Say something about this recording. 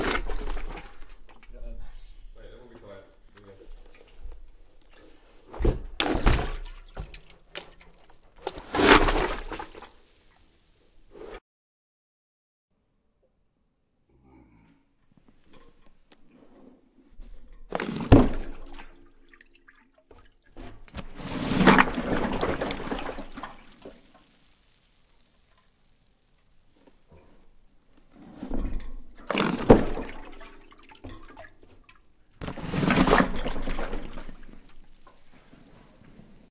Recording of me pulling a keg out of a tub of water and putting it back in with a rode NT1-A condenser.
mud, muddy, slosh, Wet, sloshing